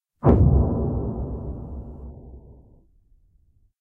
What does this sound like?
Blast Revisited
bang, detonation, transition, discharge, request, cinematic, blow-up, blast, explosion